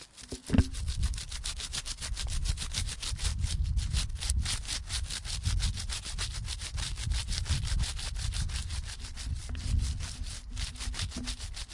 sonicsnaps LBFR Bhaar,Estella
Here are the recordings after a hunting sounds made in all the school. It's sound of a tree trunk.
Binquenais, La, sonicsnaps